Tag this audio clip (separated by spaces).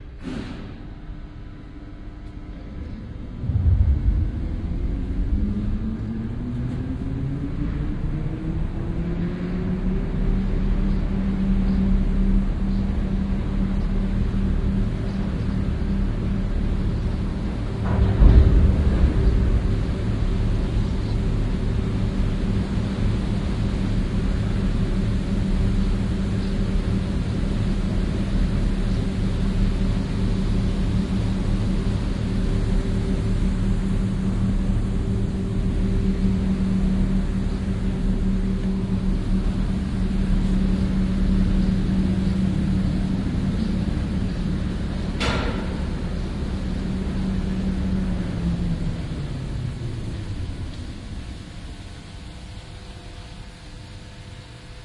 Ships Machines Industrial